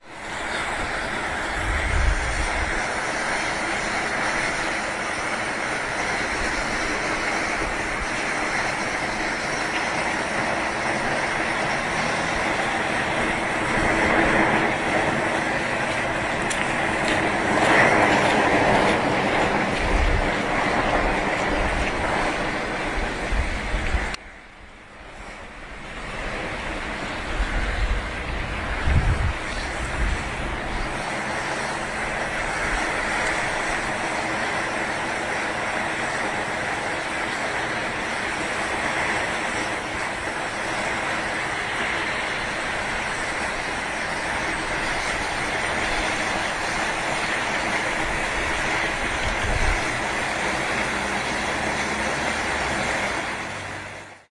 monument flame 271210
27.12.2010: about 15.00. Poznan, on crossroads Towarowa, Wierzbiecice and Krolowej Jadwigi streets. in front of a monument commemorating Polish soldiers that fought in the uprising. the swoosh of the flame. it was an anniversary of Greater Poland Uprising (1918–1919).
poland, flame, celebration, field-recording, drone, swoosh, poznan